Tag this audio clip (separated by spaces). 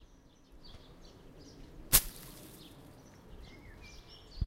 branch forest nature percussion tree